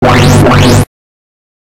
A break-noice I created using the Grain app.
Break, sfx